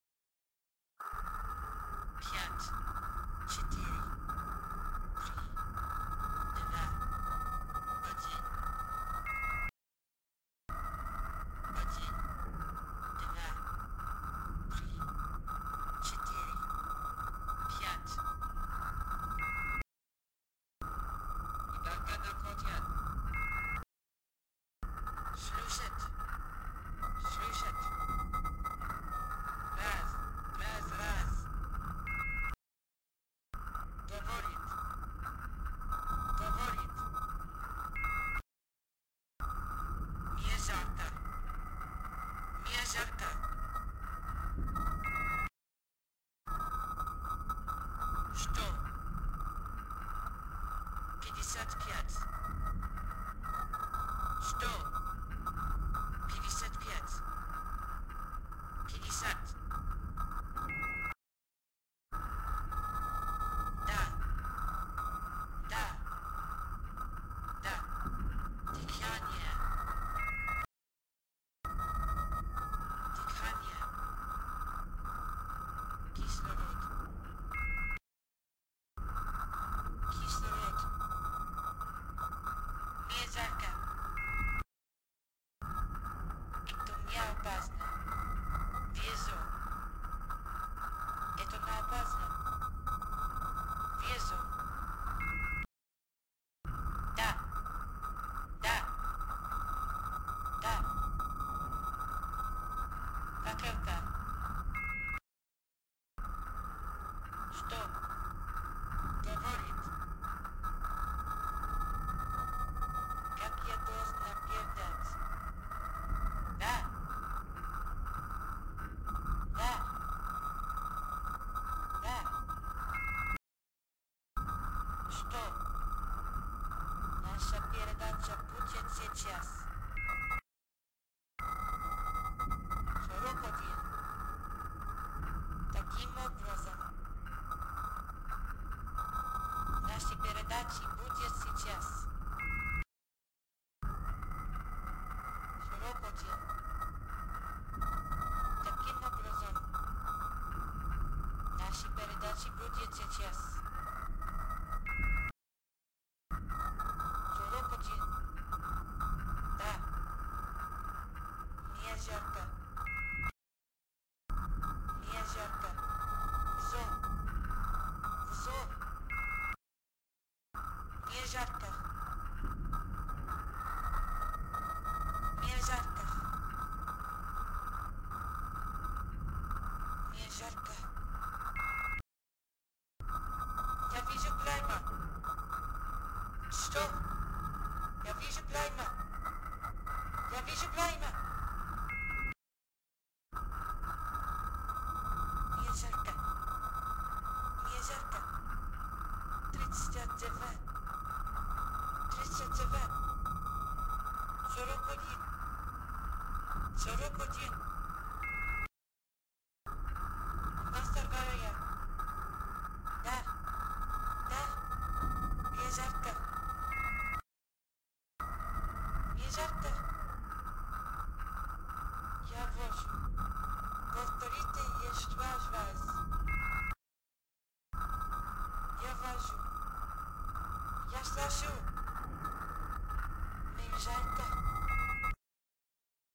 Ostensibly, this is supposed to be a cleaned-up version of the famous radio transmissions recorded by the Judica-Cordiglia brothers in November of 1963, in which it appears that a female Russian cosmonaut perished during a botched atmospheric reentry.
What it actually is, is a combination of background sounds and a static-edited recording of a female vocalist (StellarCricket), featured in one of my completed audio projects.
I'm curious to see what others can do with it!)